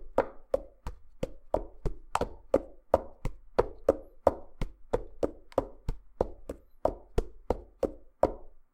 SFX - Horse - Walk
Sound of walking horse made with cups on soft pad in old-fashioned radio show style
clop, horse, radio-show-style, walk